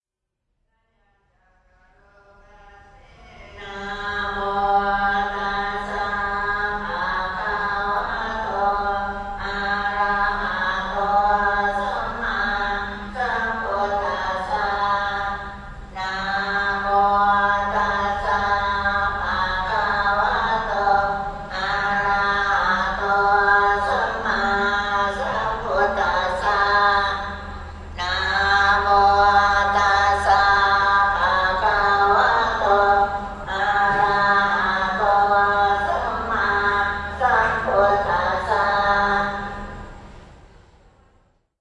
Buddhist Nun Chants
We just walking in a Buddhist nunnery in Bangkok central area and were hit by this chant.
Someone says it's Pali language -I'm not sure of that.
Asia, Bangkok, Buddhism, Buddhist, Field-Recording, Hall, Pali, Sacred, Singing, Thailand, Voice